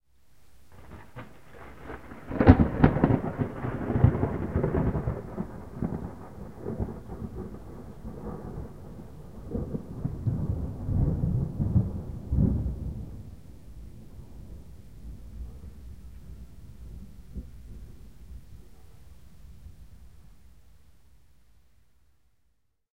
This thunder was recorded by my MP3 player in a very large thunderstorm in Pécel, Hungary.
storm, thunder, thunderstorm, lightning, weather, field-recording